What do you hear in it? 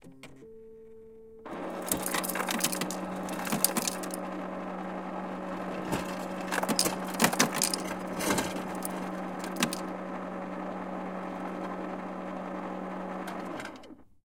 A refrigerator running out of ice while filling a glass cup.